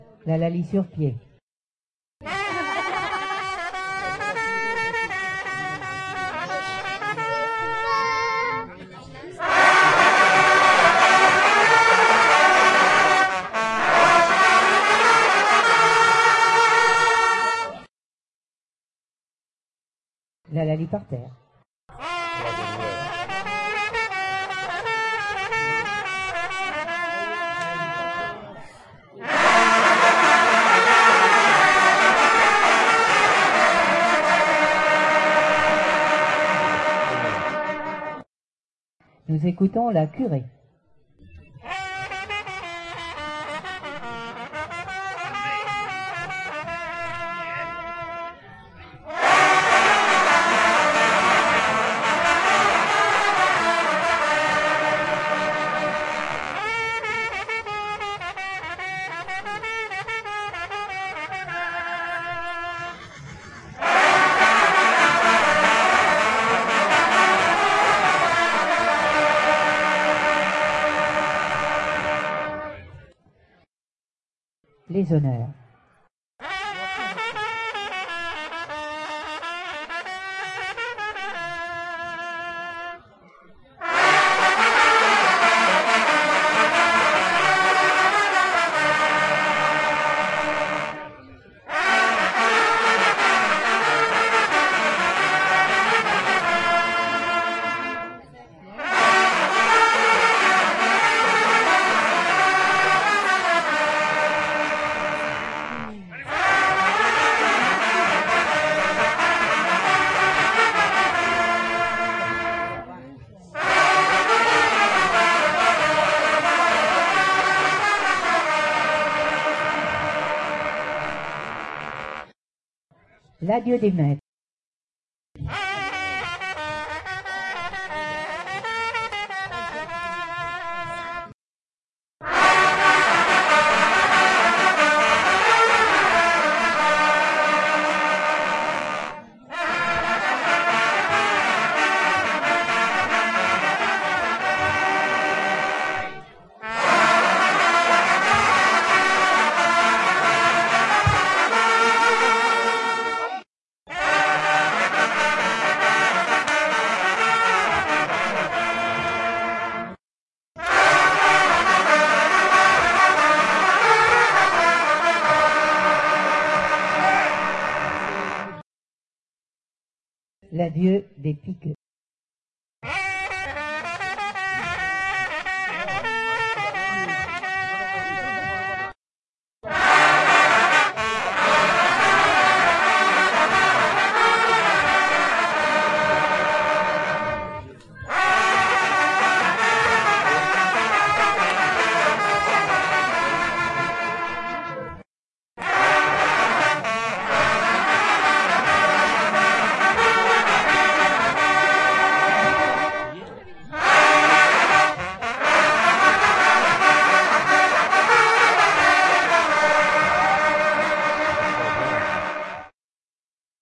hunting horn players team registered at a hunting horn contest in Montgivray (France)
france, horn, hunting